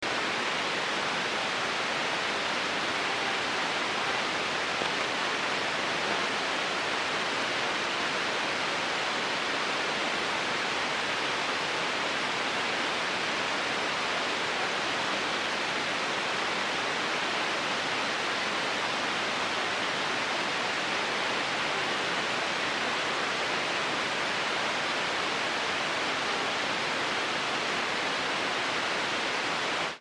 Background noise in the broadcast VHF-FM Band - WFM mode - 75 KHz. BW.